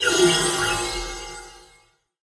A spell sound to be used in fantasy games. Useful for buffing up a character, or casting a protection spell.
spell, magic, videogames, effect, gamedev, indiegamedev, sfx, wizard, epic, rpg, game-sound, video-game, gamedeveloping, fantasy, indiedev, game, fairy, gaming, witch, magical, magician